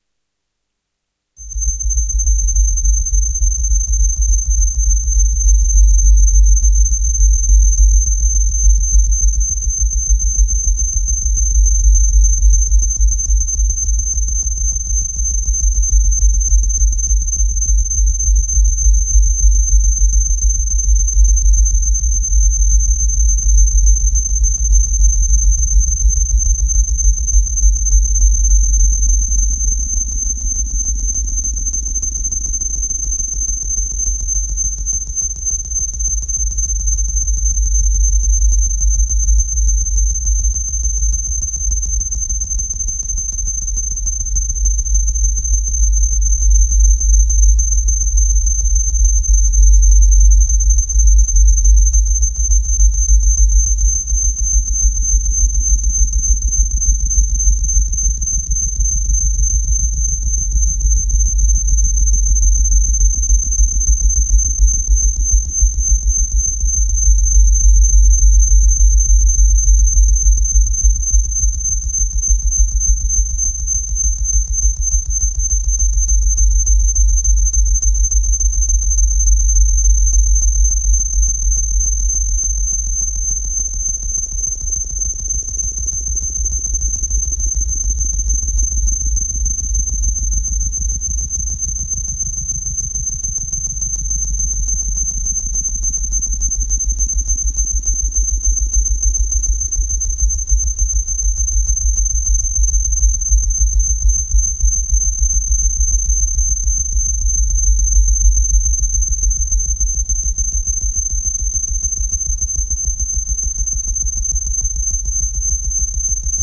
A bass note created with the free version of the "Dronos" VST
Turned down to 10 bpm
Effects: Reverb, Compressor.
ambient, atmosphere, bass, cavern, cavernous, dark, deep, drone, effect, fx, gloomy, odds, pad, sinister, soundscape, soundshape, sub, subwoofer